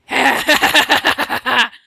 evilish laugh
a more gruesome evil laugh